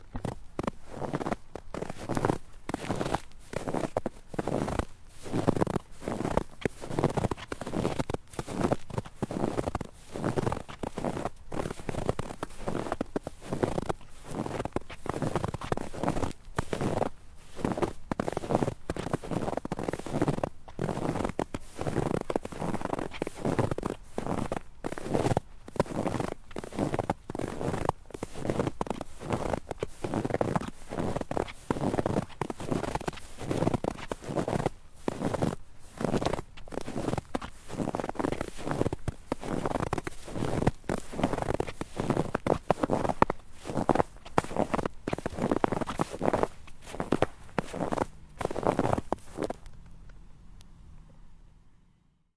Walking in Snow
Walking at a more normal pace. Mic'd about 6 inches from my feet. Yes, I looked like a wierdo.